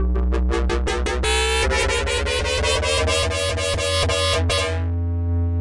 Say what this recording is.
A drum and bass bassline witch i created with fruityloops. attacking bass!